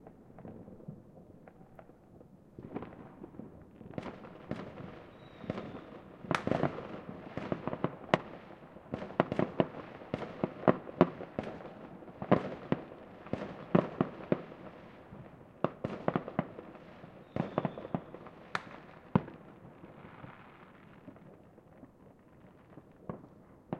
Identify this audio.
New year fireworks
whistling, firework, background, year, new